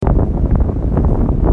wind windy storm